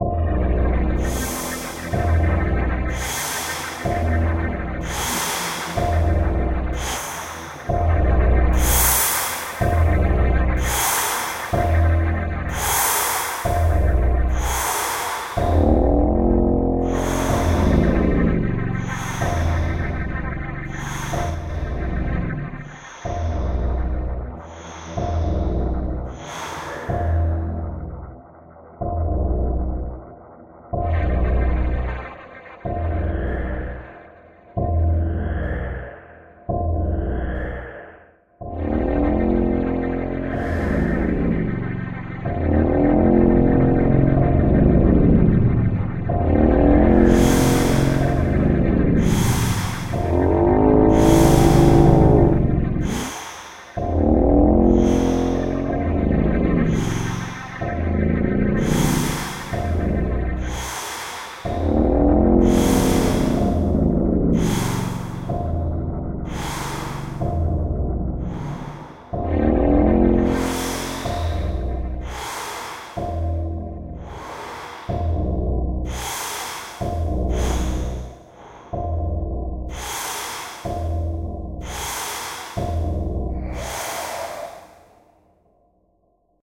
Right Behind The Wall c 3
Just a simple Drone inspired by DooM (PSX) soundtrack, Quake or anything similar.
2 Samples, 2 Synth used
No this is not me breathing, its a Monster thats right round the Corner in Front of you (behind you, if you arent cautios !)
Now you can decide to continue walking forward, or stay where you are.
doom, drone, quake